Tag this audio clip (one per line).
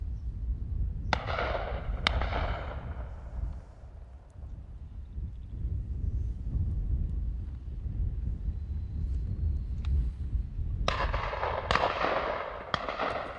gun
firing
bang
distant
fire
season
shot
shotgun
pheasants
discharge
side-by-side
shooters
shooting
shoot
over-and-under